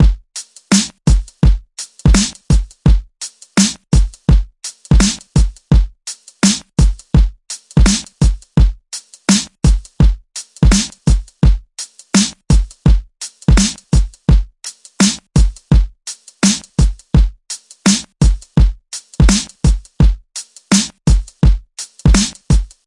HIP-HOP-DRUM-LOOP-002
Hip Hop samples